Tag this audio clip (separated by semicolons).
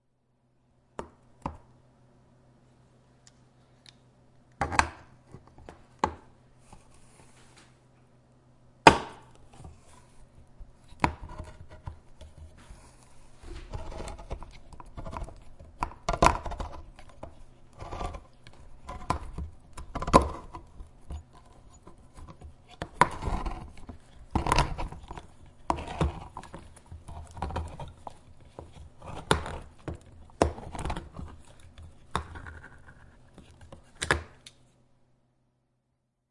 brew; coffee; gurgling